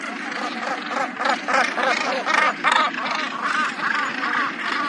A Gannet cries as he approaches the nest. Recorded in the famous breeding colony at Bonaventure Island, Gaspé Peninsula, Quebec, using two Shure WL183 capsules, Fel preamplifier, and Edirol R09 recorder.
basstolpel, alcatraz, fou-de-bassan, birds